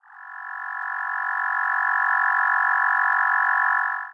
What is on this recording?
Security Scanning 01 Remastered
Security Scanning
Can for example be a robot patrolling or lasers that you have to avoid in order to not get detected and / or killed!
If you enjoyed the sound, please STAR, COMMENT, SPREAD THE WORD!🗣 It really helps!
More content Otw!